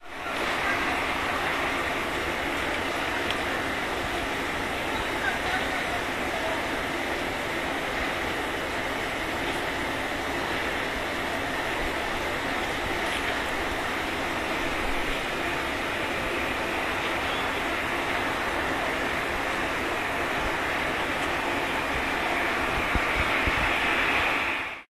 01.07.2010: about 18.45. on the E. Romera street in Sobieszow(Jelenia Gora district/Low Silesia in south-west Poland). the drone produced by the paint's factory.